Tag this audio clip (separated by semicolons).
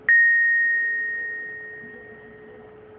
instrument; hit; percussion; one-shot